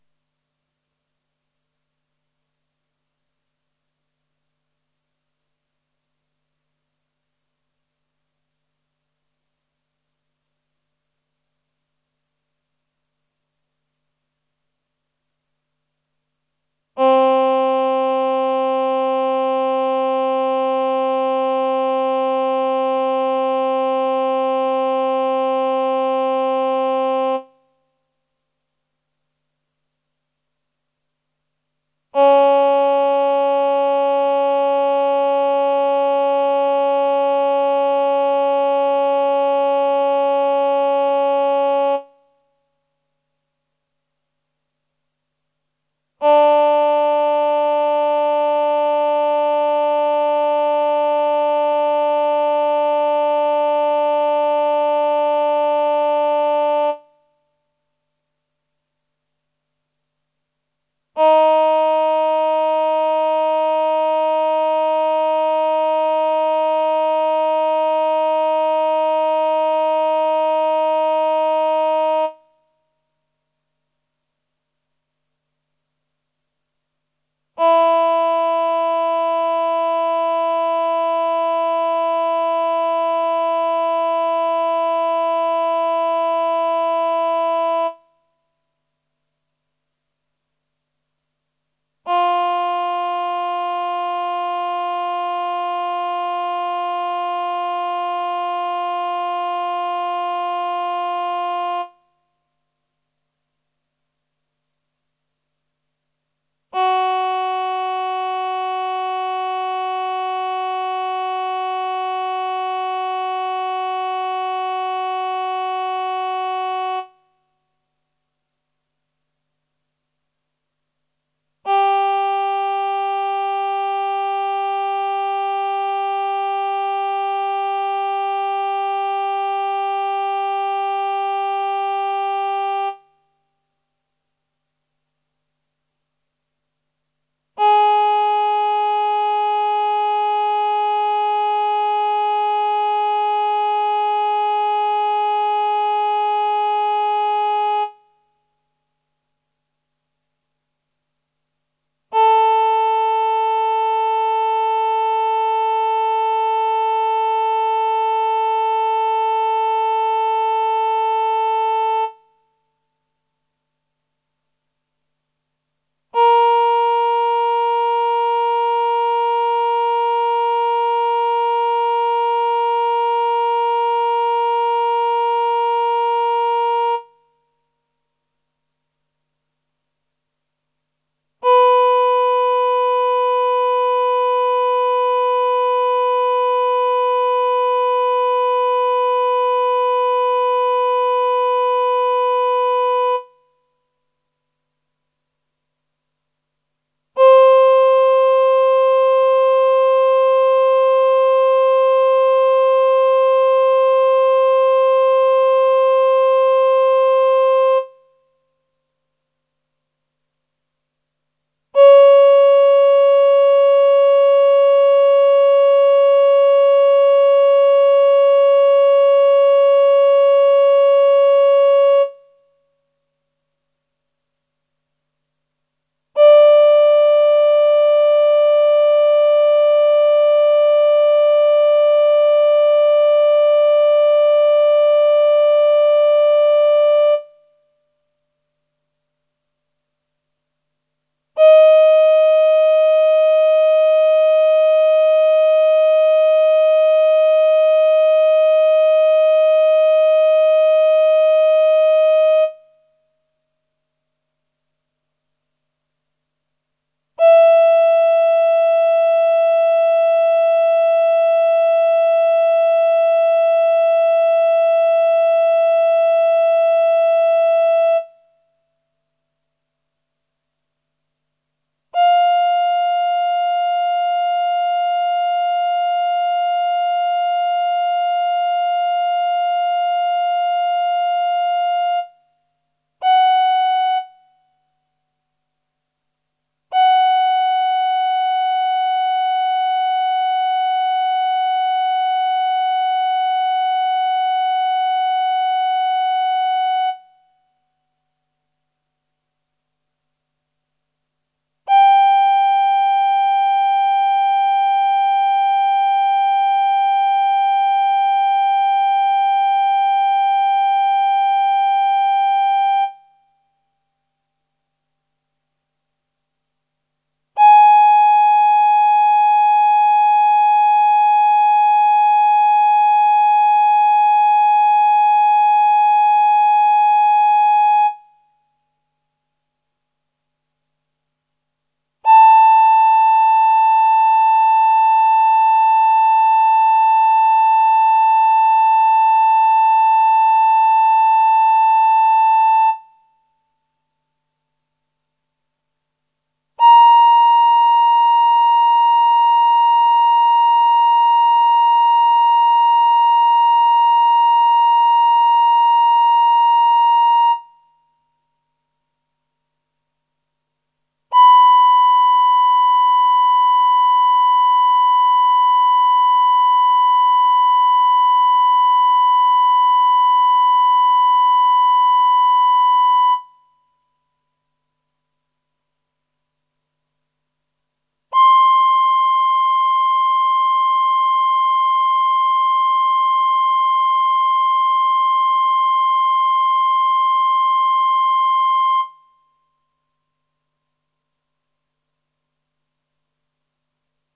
05 VP-330 Human Voice Female 4' c2-c4 in Halbtonschritten Rate 0 Delay Time 0 Depth 0
Plus, Female